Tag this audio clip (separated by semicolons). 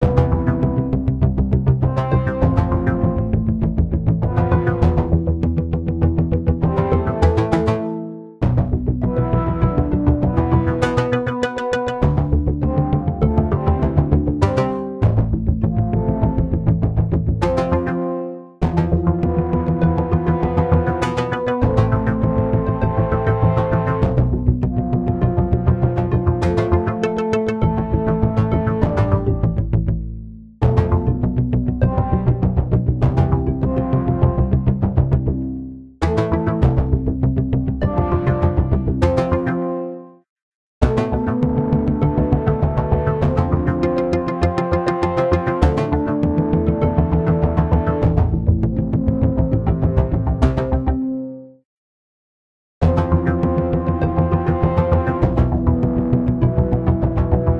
Composer; Drone; Movie; Sample; Sci-Fi; Symphony